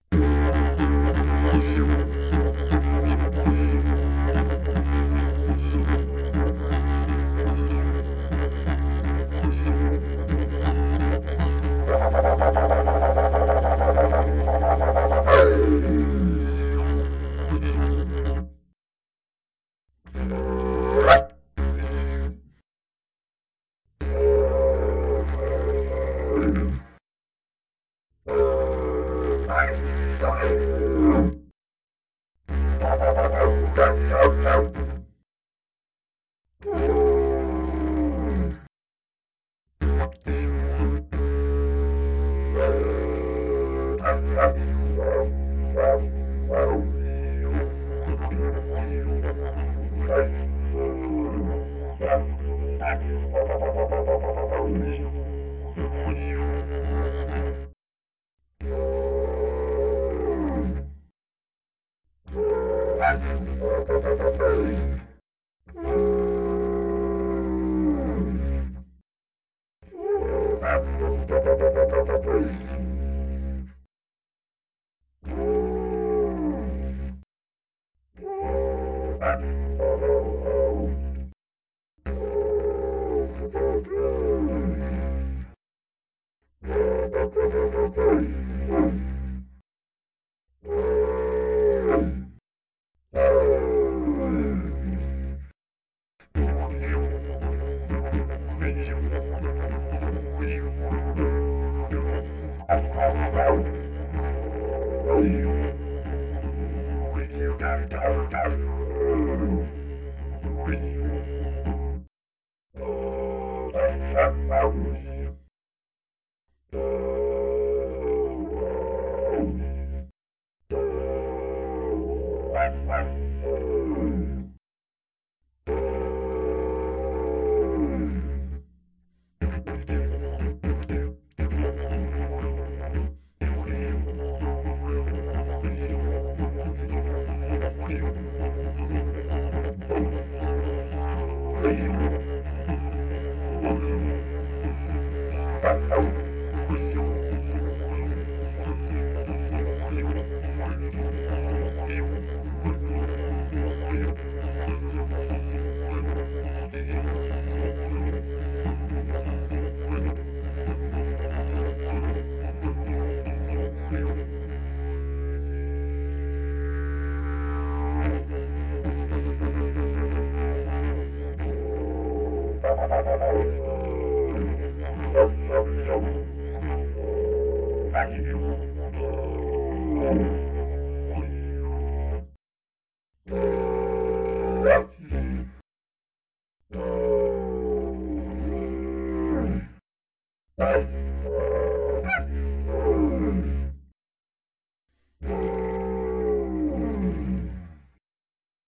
didg 3 sample
australian, didgeridoo, didjeridu, dig